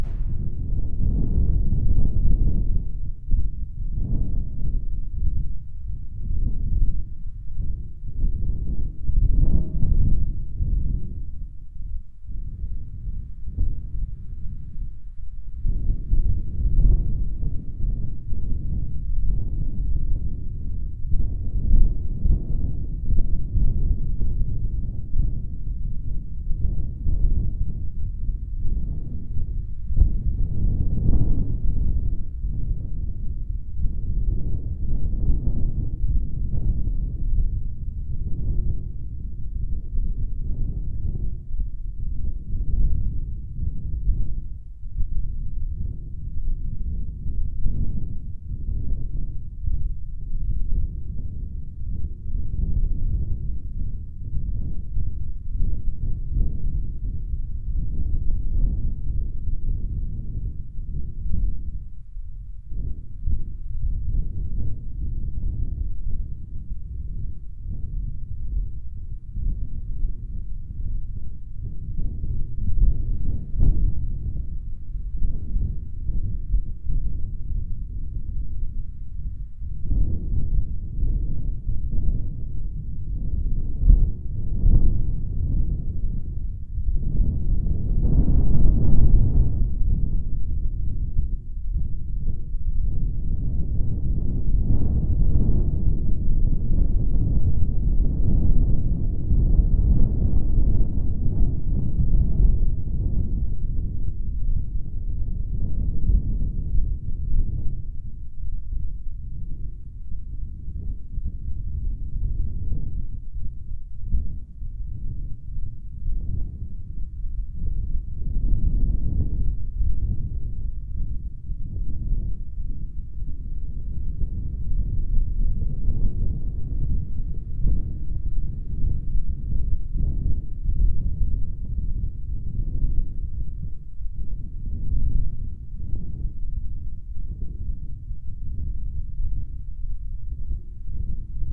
wind 13km per hour 11pm 29 NOV 2020 werribee south beach
A light breeze at Werribee South Beach Marina 11pm Sunday 29 NOV 2020. According to the internet it was 13km/h wind and 85% humidity. Recorded with Zoom H4n Pro. Victoria, Australia.
Melbourne, Australia.